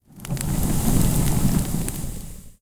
Tree Burns Down
burn, burning, cast, caster, consumed, destruction, fire-magic, flame, flames, flaming, hot, ignition, magic, quick, spell